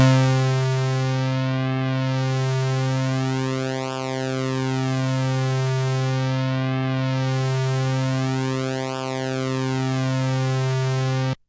Soundsample from the Siel Opera 6 (Italy, 1982)
used for software samplers like halion, giga etc.
Sounds like the 8bit-tunes from C64
Note: C3